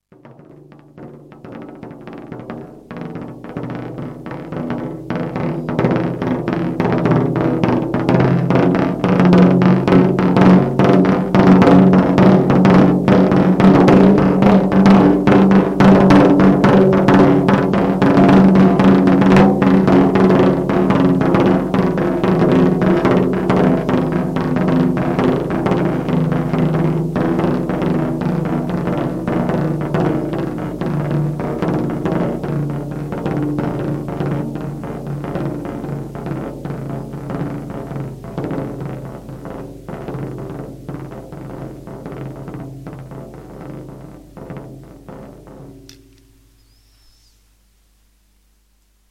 Just making fish music with three drums